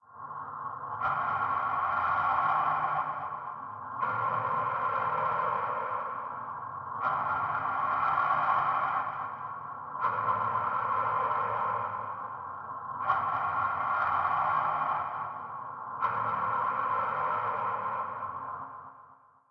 Breathing Man Machine 2
A new breath of an android, robot, a machine or an alien. created with the plex synthesizer.
This time: more FX.... guitar amp, stomps, chorus....
alien, android, artificial, bionic, cyborg, galaxy, machine, mechanical, robot, space, spaceship